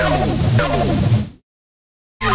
rave tunes 102 bpm-09
rave tunes 102 bpm
102, acid, bpm, club, dance, delay, dub, dub-step, electro, house, loop, minimal, rave, techno, trance, tunes